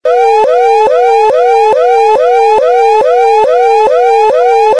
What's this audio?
003 - Invasion Alarm
A multi-function siren alarm sound that sounds a bit like a security alarm or an emergency alarm. It can fit pretty well in anothers situations too, like a submarine, a spaceship, a sci-fi laboratory, etcetera.
Made in a samsung cell phone, using looper app, and my voice and body noises.